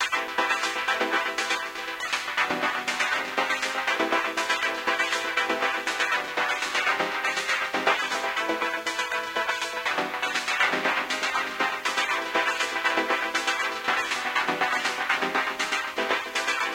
Osiris Virus C recorded to a tape and back